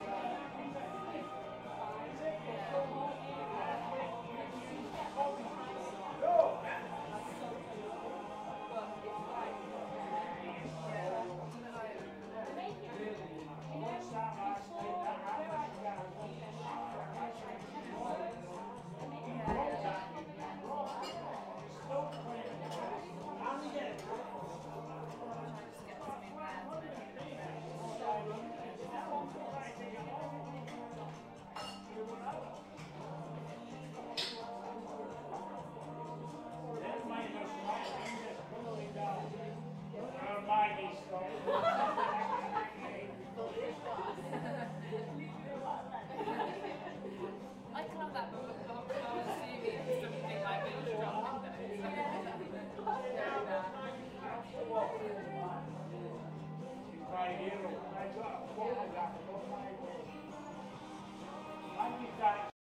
Quiet Bar
A recording of my local pub on a quiet afternoon. Recorded with a Tascam DR100 and Behring C4 microphone
Bar, Beer, Crowd, Pub